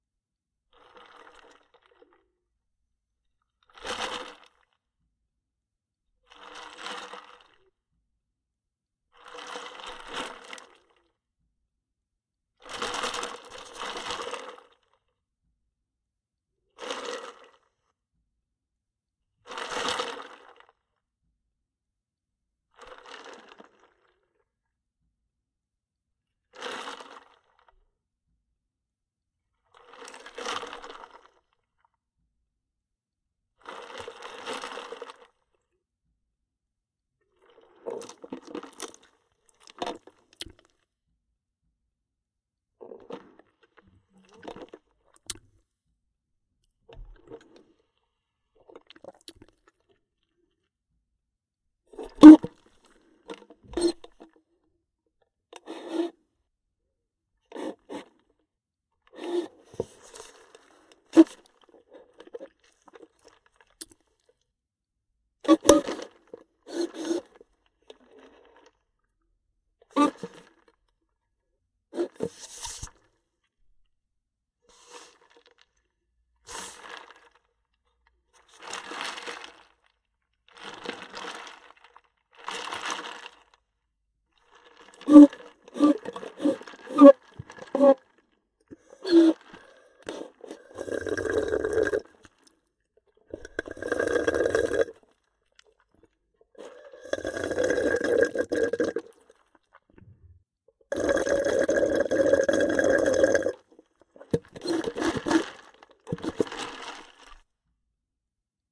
Fast Food Soda - Ice rattling, sloshing, sipping, straw squeaks, drinking
Recorded my fast food soda pop. Included the ice rattling in the cup, ice sloshing in the liquid, shaking the cup, pulling the straw up and down, sipping the soda and that empty soda sucking sound.
Recorded in my sound booth with a Neumann TLM 103.
ice, plastic-straw, coke, ice-rattle, swallow, iced-drink, pop, soda, drink, slurping, carbonated, sip